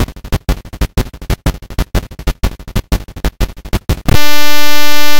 Drumloops and Noise Candy. For the Nose